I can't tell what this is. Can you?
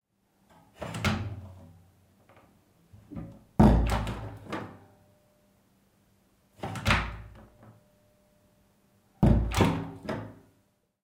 Toilet door1
Old door opening-closing in an old house
Zoom H6 recording
closing, open, opening, toilet